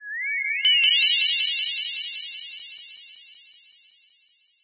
This is a sound effect I created using ChipTone.